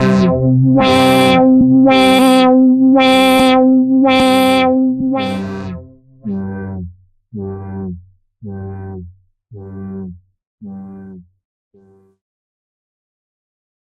Broken Transmission Pads: C2 note, random gabbled modulated sounds using Absynth 5. Sampled into Ableton with a bit of effects, compression using PSP Compressor2 and PSP Warmer. Vocals sounds to try to make it sound like a garbled transmission or something alien. Crazy sounds is what I do.
evolving, loop, glitch, ambient, electronic, drone, pads, cinematic, granular, samples, texture, synth, experimental, atmosphere, space, dark, industrial, vocal, pack, artificial, horror, soundscape